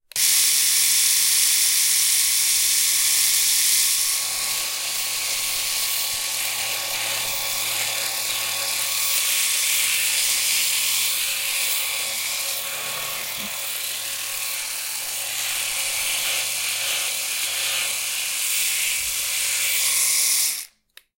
Razor Shaver Electric
The sound of my electric razor/shaver.